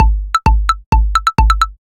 Percussive Slut
A percussive EDM loop made using FL Studio's step sequencer and stock samples included with the software.
A, brazilian, cheesy, cowbell, dance, diplo, edm, funky, house, I, M, percussion, pop, weird